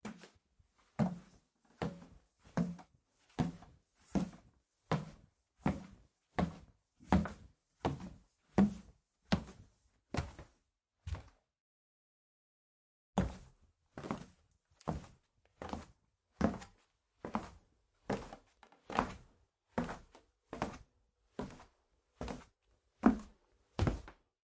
Walking up and downstairs.Wooden stair(dns,Vlshpng,Eq)
Recording steps on the wooden staircase of a country house. Down and up stairs. Recorded on Tascam DR-05x. Enjoy it. If it does not bother you, share links to your work where this sound was used.
Note: audio quality is always better when downloaded.
effect, film, foley, foot, foot-steps, footsteps, fx, sound, stair, staircase, stairs, stairway, steps, walk, walking